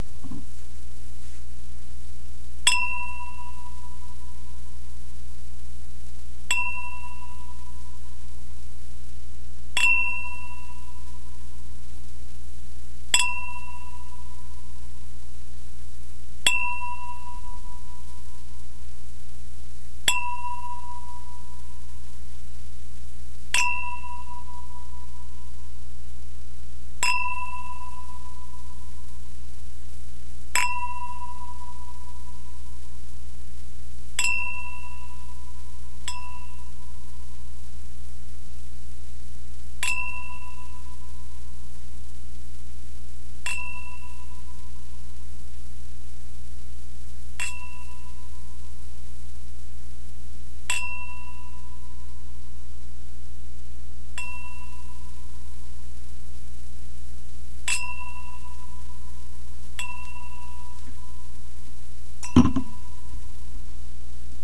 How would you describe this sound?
The clinking of two empty crystal wine glasses. Recorded with a cheap Labtec LVA-8450 headset. Mono, unprocessed.
glass, indoor, ping, unprocessed